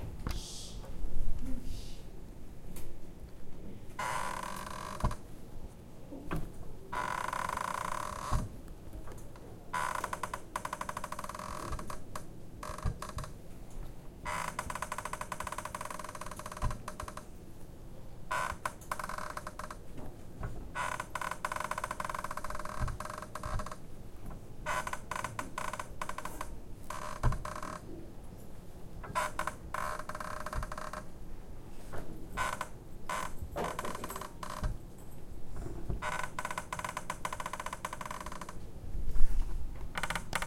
Sound we recorded in class by pushing up and down gently on a table. Sounds like a door creaking.
creak, creaking, door-creak